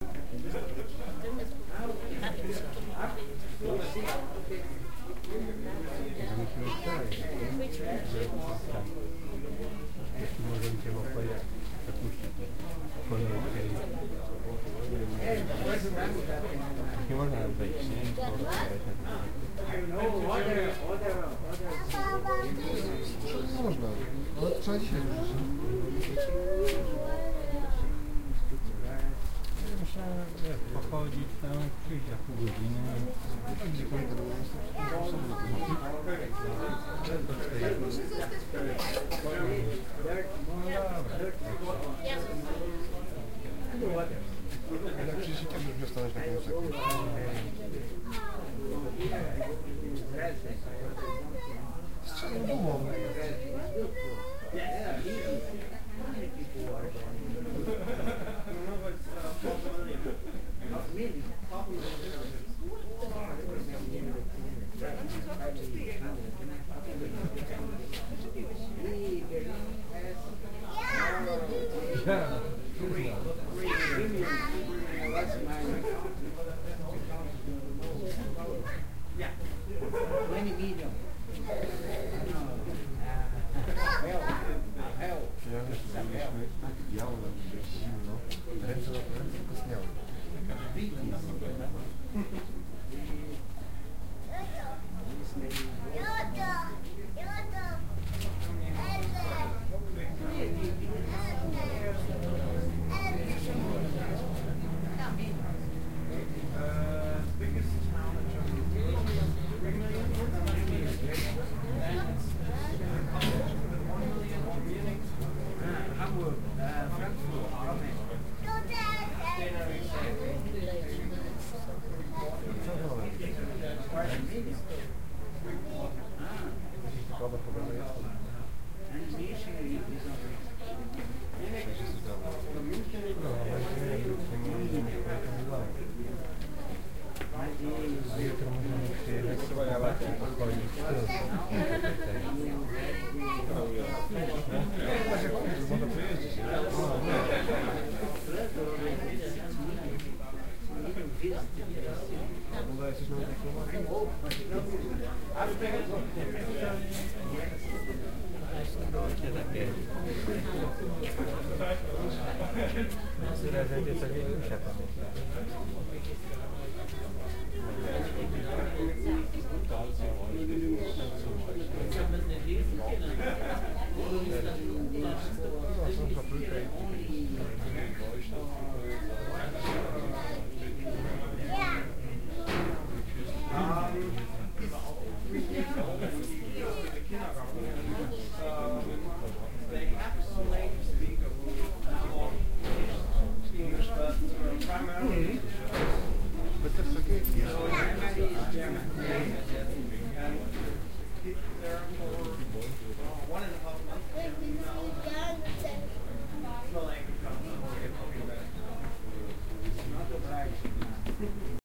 Waiting in a cue
The magnificent New Town Hall was built at the beginning of the 20th century. To take the diagonal lift up in the dome and enjoy the views of Hanover, you have to wait sometimes, in this case s.th. like 30 minutes, some of this waiting I recorded with the Soundman OKM II studio and their DR-2 recorder.
Don’t miss the large city models on display in the main hall showing how Hanover has changed through time.
rathaus, atmosphere